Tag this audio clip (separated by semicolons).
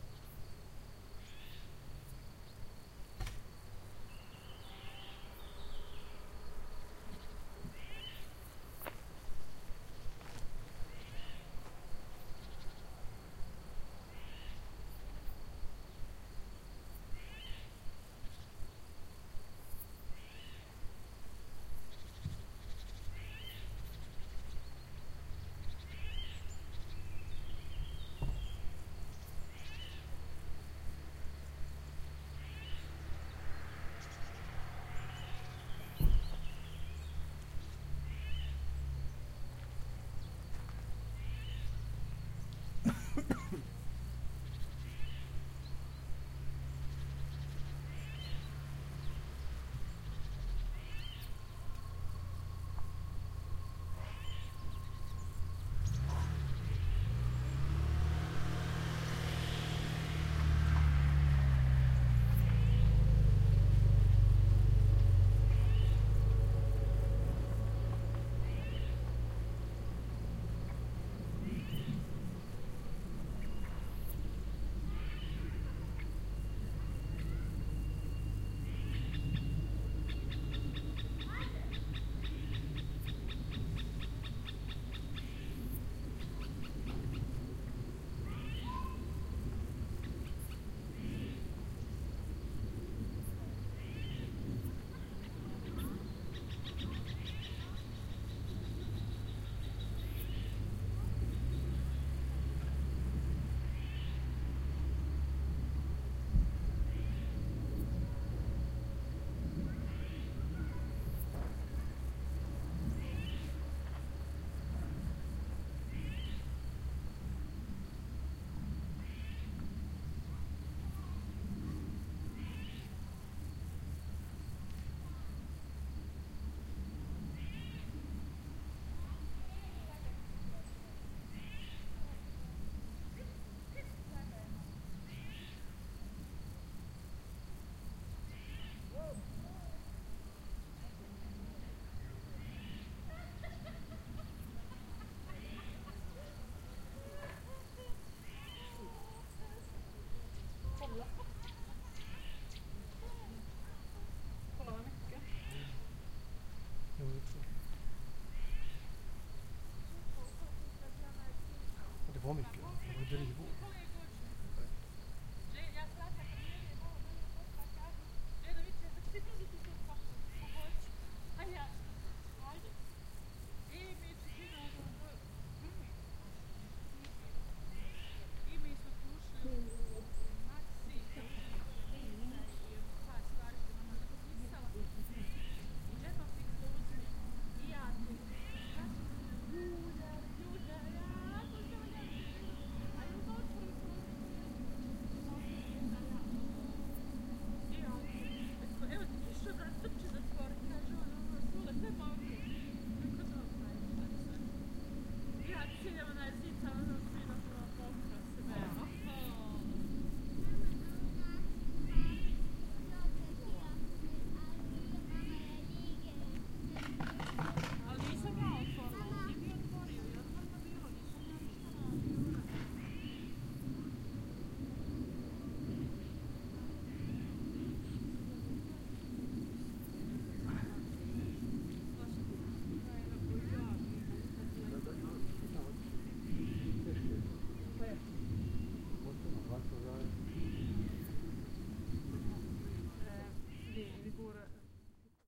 Balkan; birds; children; crickets; Field-recording; Humans; Serbia